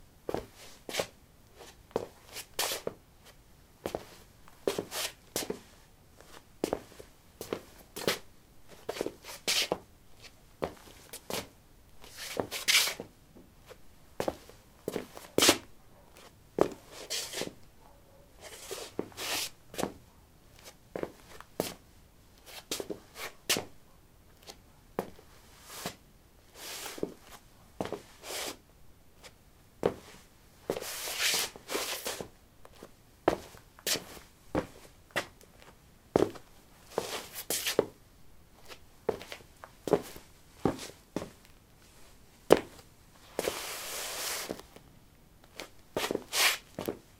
lino 13b sportshoes shuffle threshold
Shuffling on linoleum: sport shoes. Recorded with a ZOOM H2 in a basement of a house, normalized with Audacity.
footstep, footsteps, step, steps, walk, walking